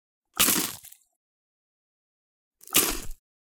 Multiple "bites" with rice cakes and crispbread mixed to achieve a full crispy bite sound.